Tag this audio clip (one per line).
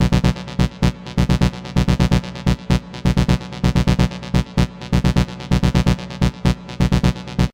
acid
bass
dance
electronica
trance